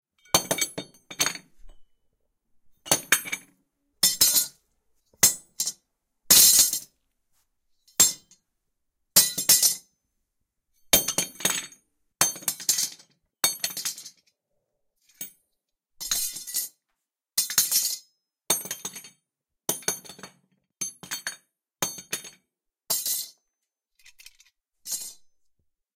Dropping metal tools on concrete. Recorded in a garage using Tascam iM2 stereo mic & iPad2.
tech, concrete, garage, impact, stereo, drop